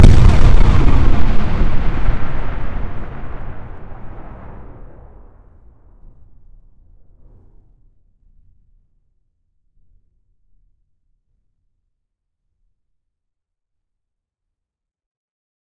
A totally synthetic explosion sound that could be the firing of a large gun instead of a bomb exploding. The reverberant tail is relatively long, as though the explosion occurs in a hilly area. But you can reshape the envelope to your liking, as well as adding whatever debris noise is appropriate for your application. Like the others in this series, this sound is totally synthetic, created within Cool Edit Pro (the ancestor of modern-day Adobe Audition).
synthetic, bomb, good, gun, blast